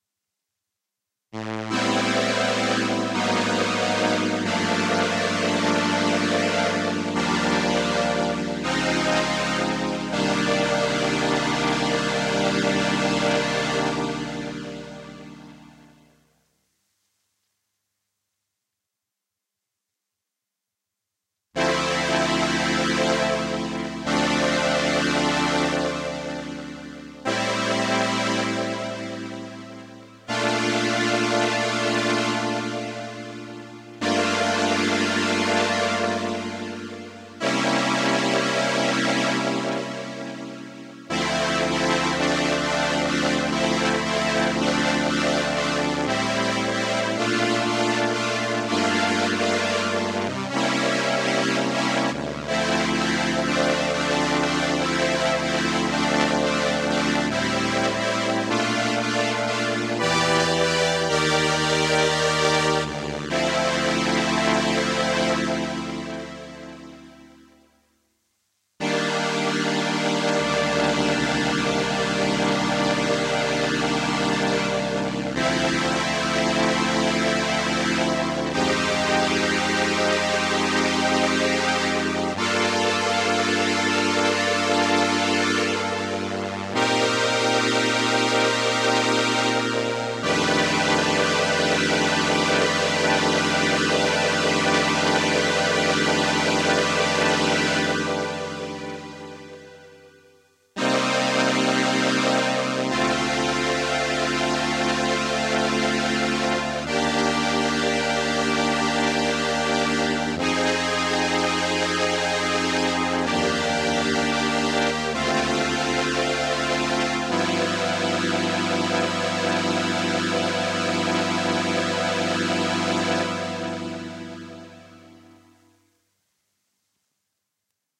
Solina Chords
Chords played on an Arp Solina String Ensemble.
Solina, Strings, analog, chords